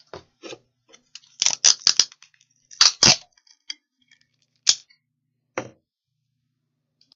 Using sellotape
Freemaster